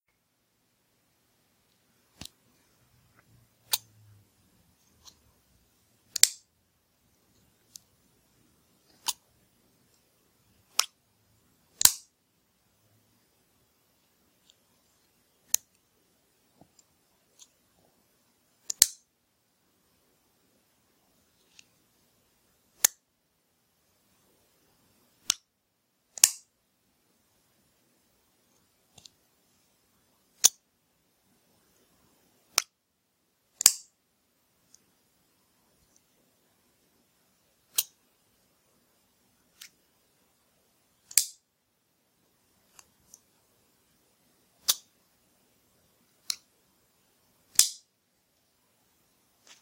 A 3-inch folding pocket-knife opening and closing. Recorded very close to the microphone. Makes a snick/click sound. The sharp blade folds into a steel housing so the sound is metal on metal.
Recorded: August 2014, with Android Voice Recorder (mono), inside/evening in very quiet room. Noise reduction with Audacity.